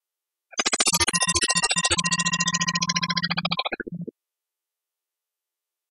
Data processing sound